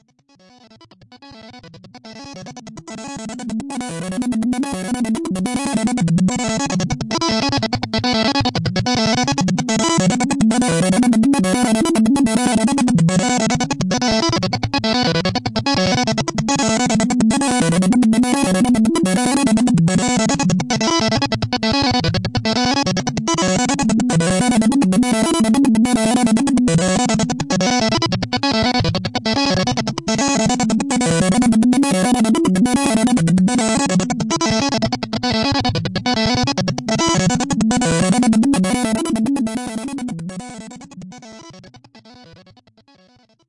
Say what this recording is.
Choppy musical sequence of a couple VCOs through filter being modulated by pulse LFO with varying pulse-width.